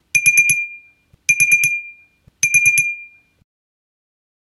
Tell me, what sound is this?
A ding ding sound